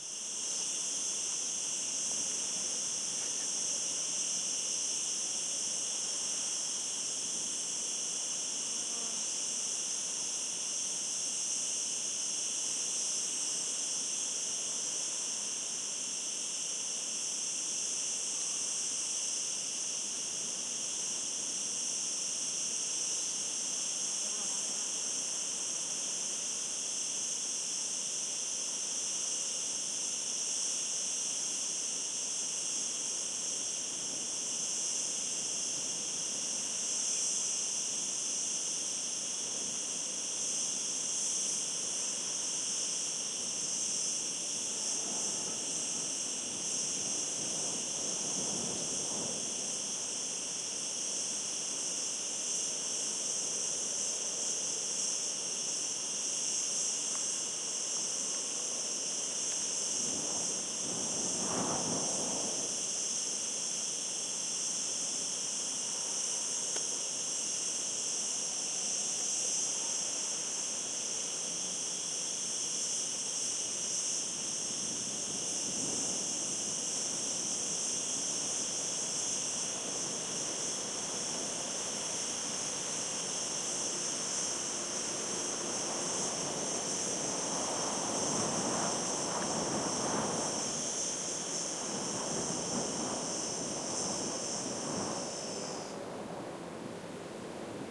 20110903 cycadas.n.wind.06
Cycadas singing, wind shakes vegetation near the end. Shure WL183, Fel Preamp, PCM M10 recorder. Recorded near the coast at Las Negras area (Almeria, S Spain)
cycadas, field-recording, insect, summer